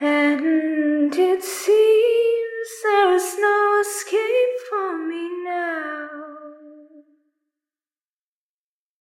'And it seems there is no escape for me now' Female Vocals (Cleaned with reverb by Erokia)
A female voice singing the lyrics 'And it seems there is no escape for me now'. Cleaned with reverb by Erokia!
clean, female, girl, lyrics, reverb, sing, singing, vocal, vocals, voice